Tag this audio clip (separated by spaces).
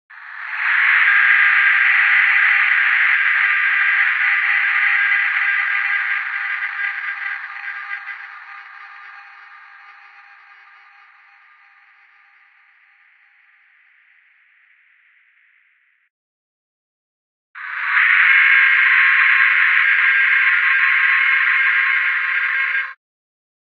ambience ambient atmosphere bitcrushed calm cry ghost haunting howl noise sad spooky sunvox